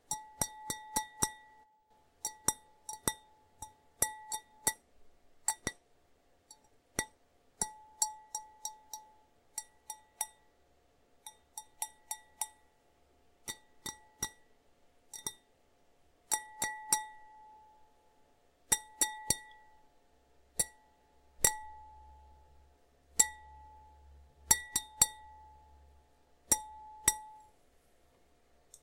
Delicate and harder tinkles on a pint glass.